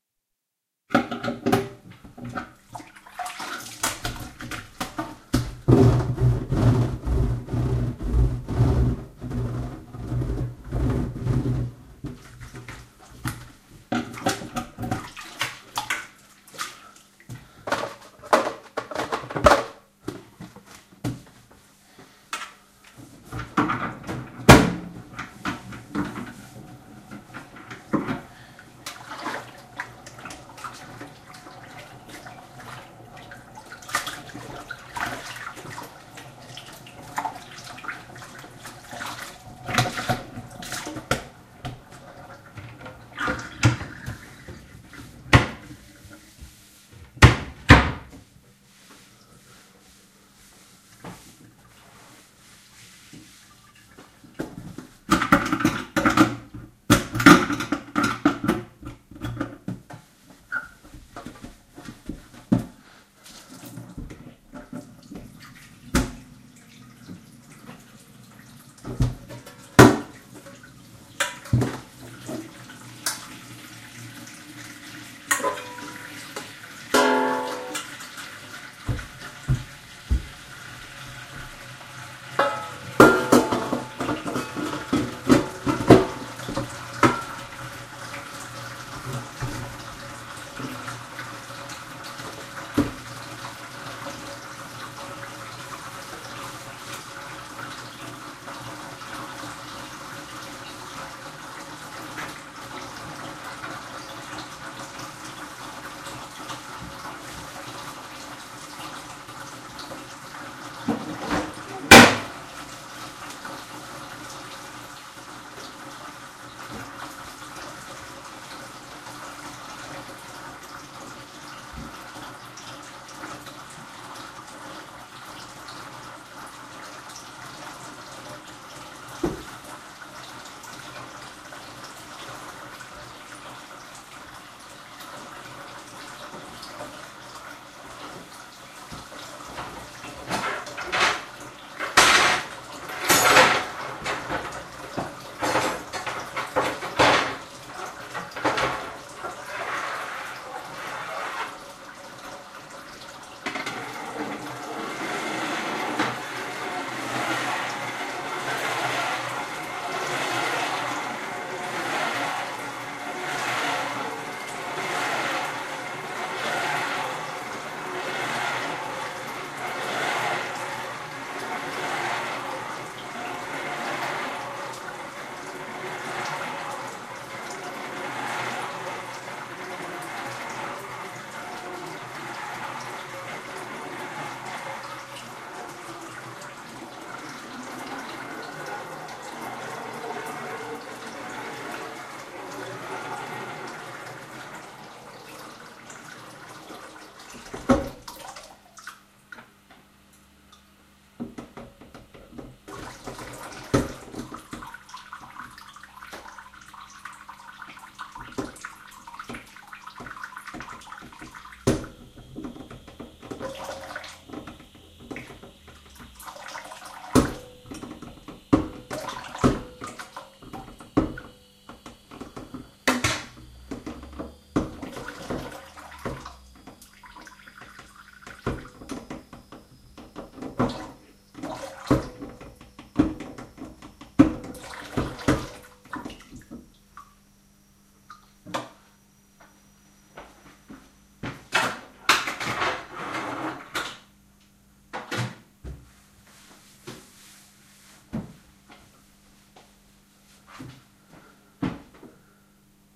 These sounds were made in a kitchen using various items to make scientific noises in a 'crazy scientist' manner.
frankenstein, madscience, experiment, laboratory